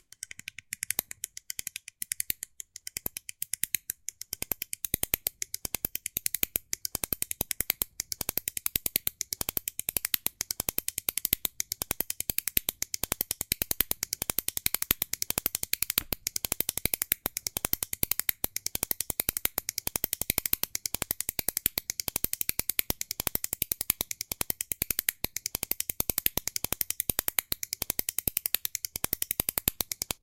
A stereo recording of the ratchet on a Carpenters Brace (As in bit and brace). Zoom H2 front on-board mics.